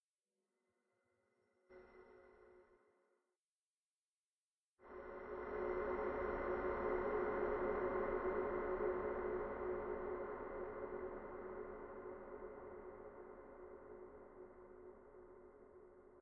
Surround dronetail -06
The sound of a heavy door in a gigantic cathedral on Mars. This is a
sample from the "Surrounded by drones pack 1" sample pack. It is a
It was created within Cubase SX.
I took a short sample from a soundscape created with Metaphysical
Function, an ensemble from the Electronic Instruments Vol. 2 from
Native Instruments, and drove it through several reverb processors (two SIR's using impulses from Spirit Canyon Audio and a Classic Reverb
from my TC Electronic Powercore Firewire). The result of this was
panned in surround in a way that the sound start at the center speaker.
From there the sound evolves to the back (surround) speakers. And
finally the tail moves slowly to the left and right front speakers.
There is no sound for the subwoofer. To complete the process the
samples was faded at the end and dithered down to 16 bit.